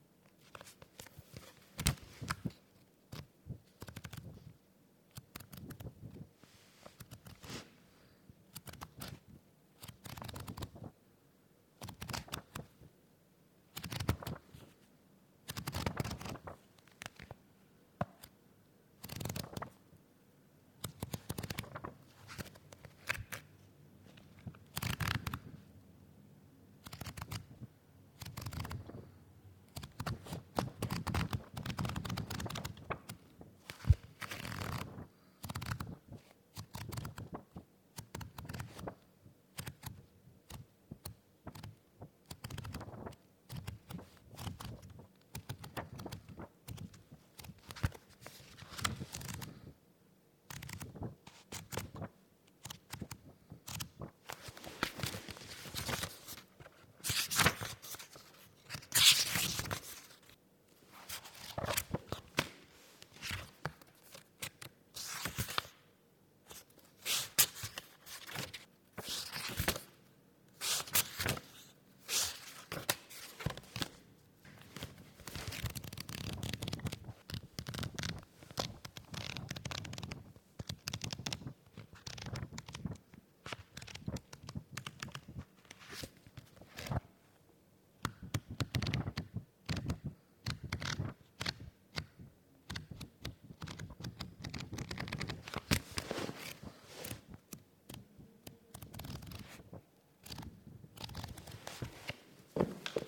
book browsing02
Foley sounds of book browsing recorded in room
includes
- random browsing
- single pages turn
- random browsing
recorder: Tascam HD-P2
mic: Rode NTG-2
processing: none
Book, browsing, flip, read, reading